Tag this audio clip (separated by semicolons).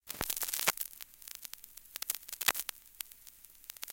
vintage; vinyl; noise; surface-noise; lofi; LP; crackle; turntable